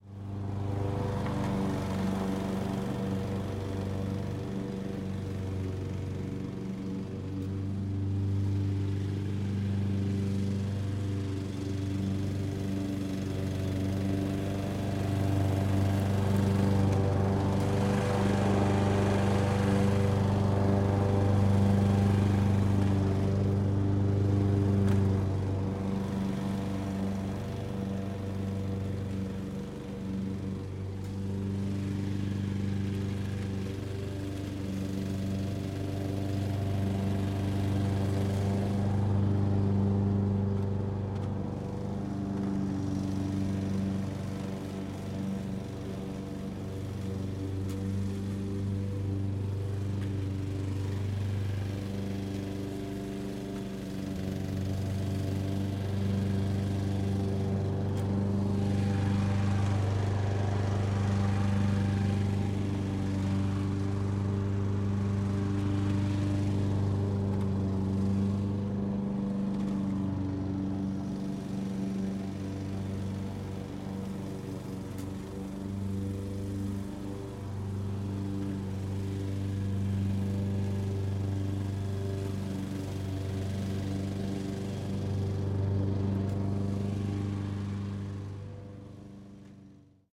Household Ambience Lawn Mower Mowing 02
Household Ambience Lawn Mower Mowing